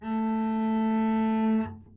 Double Bass - A3
Part of the Good-sounds dataset of monophonic instrumental sounds.
instrument::double bass
note::A
octave::3
midi note::57
good-sounds-id::8662
A3, double-bass, good-sounds, multisample, neumann-U87, single-note